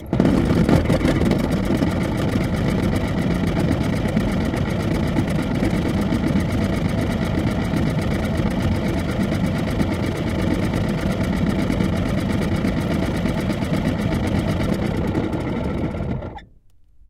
volodya motor 5
The heater in an old Volvo station wagon spins up, runs, and stops. It's very pronounced and, well, broken. Recorded in September 2010 with a Zoom H4. No processing added.
spin, volvo, motor